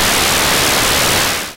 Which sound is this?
outdoorlawnmachine1-sfxr
videogame, chip, game, lo-fi, arcade, video-game, chippy, retro, sfxr, 8bit, vgm, 8-bit